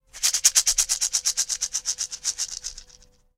NATIVE RATTLE 01
A native north-American rattle such as those used for ceremonial purposes.
aboriginal, ethnic, first-nations, hand, indian, indigenous, native, north-american, percussion, rattle